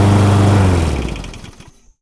CM Lawnmower Shutdown 3
The sound of a lawnmower shutting down.
grass, mower, shut, lawn, engine, down, cutter